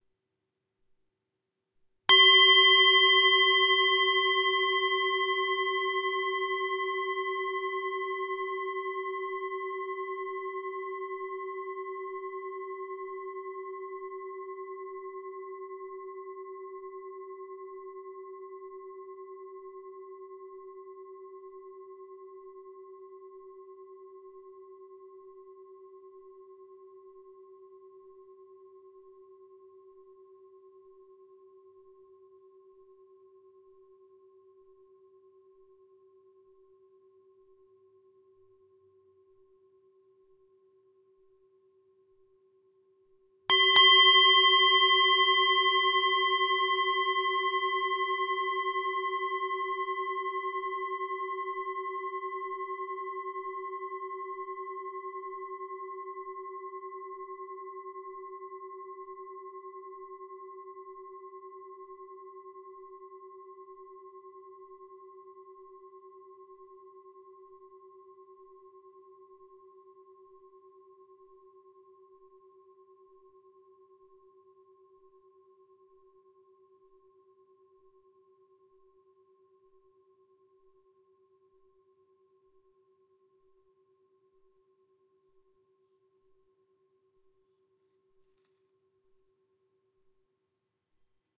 Himalayan Singing Bowl #3
Sound sample of antique singing bowl from Nepal in my collection, played and recorded by myself. Processing done in Audacity; mic is Zoom H4N.
hit, bowl, percussion, ring, tibetan-bowl, brass, ting, bell, metallic, meditation, tibetan, gong, clang, strike, metal, bronze, singing-bowl, harmonic, drone, chime, ding